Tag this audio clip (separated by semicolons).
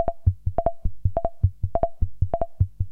bleep; microcon; technosaurus; loop; analog-synth; LFO; rhythm